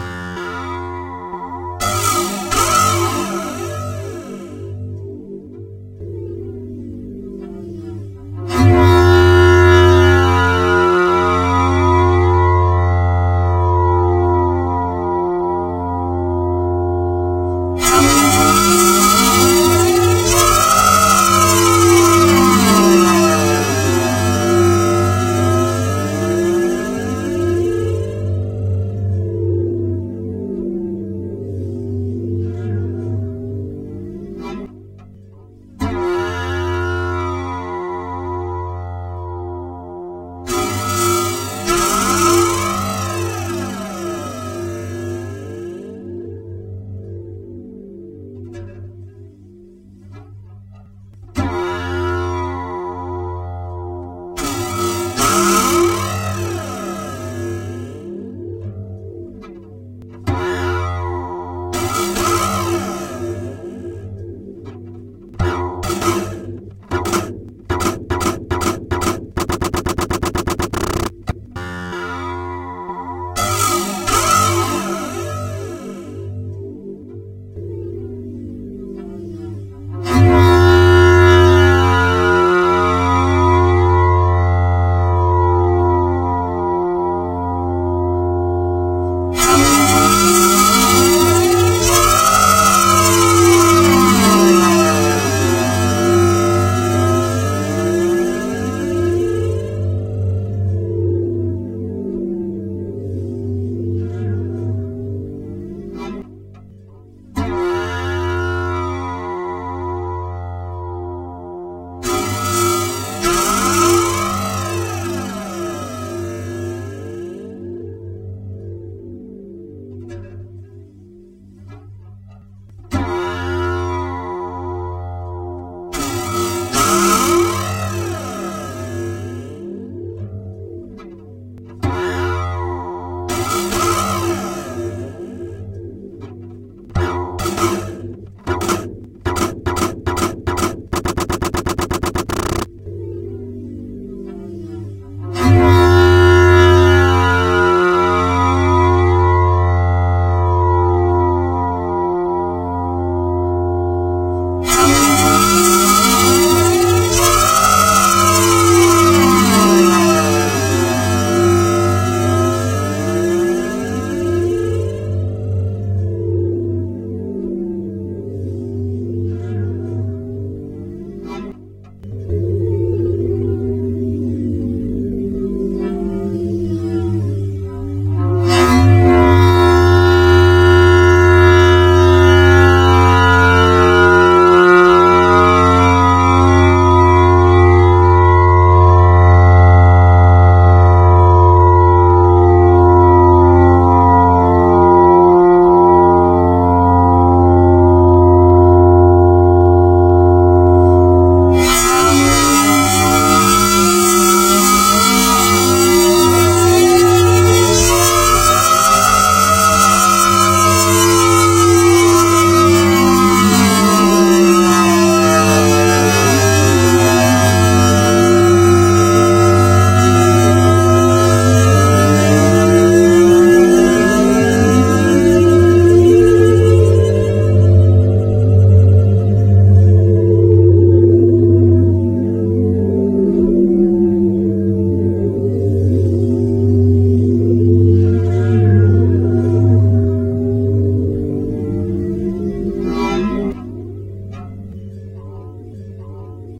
Hi all, hope not all subskribers have left. What can you get out of this sound...uh...perhaps on a Beauty saloon on the planet Dune, for washing brain from drug combustion remnants after a long day's work in the mines.
sound, healthy, sounds, relaxing, crazy